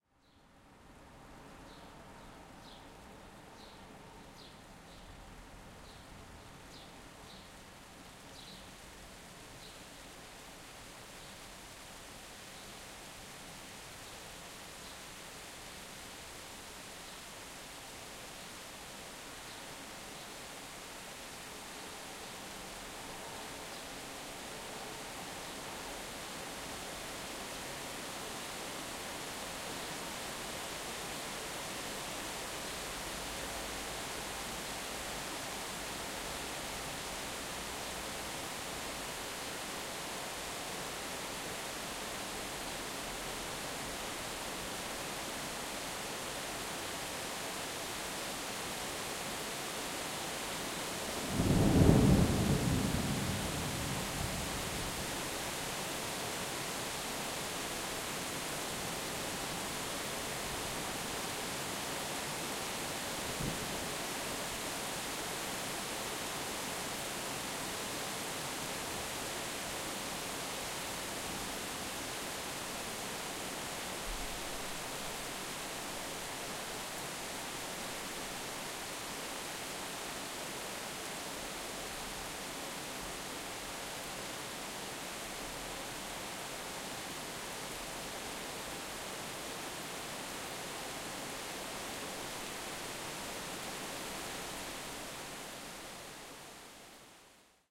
Start rain Tallinn tascam DR 44W
Recorded in Tallinn(Estonia) by Tascam DR 44W
Summer Thunderstorms and Rain